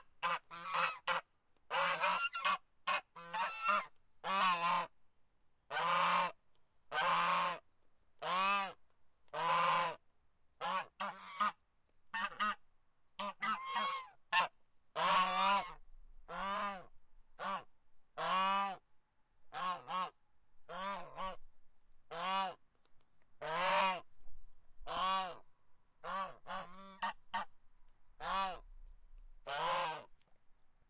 Goose, Korea, Sound
Goose, Korea